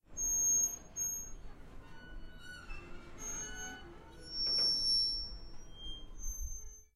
Boat Chain Creaking
Creaking of the chains of the Boats at porto antico in Genova as they were moving slightly to the very slow tide. A conversation between boats.